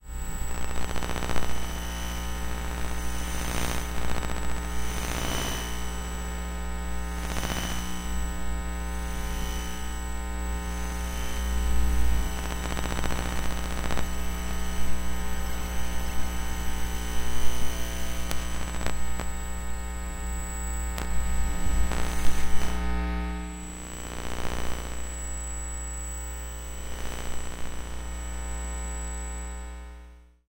This is the sound of electromagnetic waves being captured from a laptop computer.
waves, digital, glitch, electromagnetic, static, hum, interference, computer, electronic, noise, buzzing, glitchy, radio